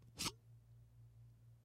Quick pulling cap off martini shaker